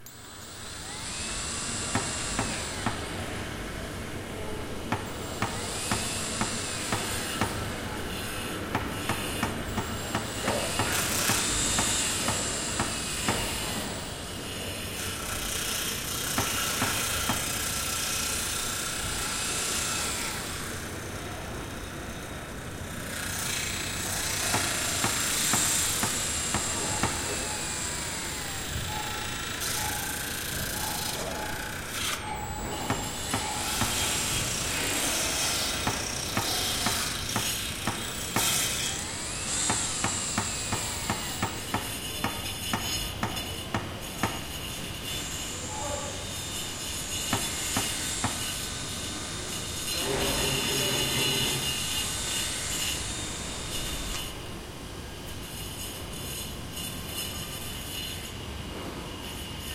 Originally put together for an audio drama for Misfit's Audio to replicate the sounds of construction taking place beneath ground level, hence the reverb.
There is also a version in the same pack without the reverb.